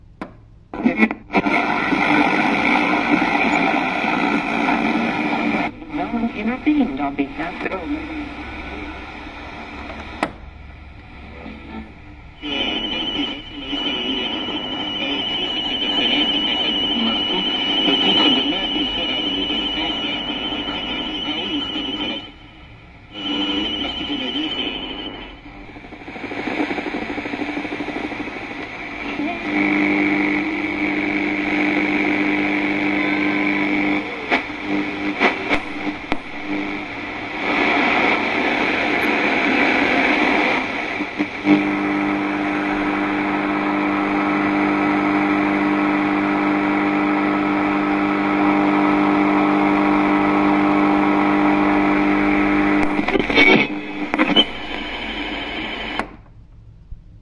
Radio Noise
A radio tuning through static noises.
Recorded with Edirol R-1.
am, vintage, scramble, radio, buzzing, buzz, noise, frequency, tuning, radiowaves, scanner, fm, encoded, morse, retro, interference